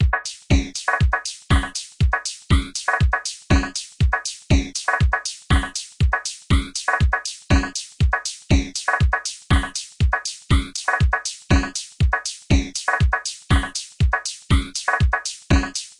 This is a 120 bpm rhythmic loop I created in Reason. It contains bassdrum, hihat, snare and congas.
rhythmic, rhythm, beat, conga, loop, congas, 120bpm, flanger